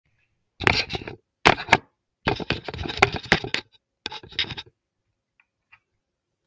running sound
runiingsfx, usedamic, runningsound, running, sfx, couldbesomthingelsetoo